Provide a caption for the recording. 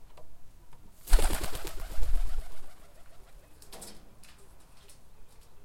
Pigeons flying sound effects realized with Tascam DR-40X